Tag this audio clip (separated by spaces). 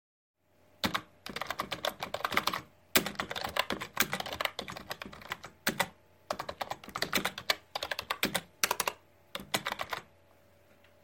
touch keyboard pc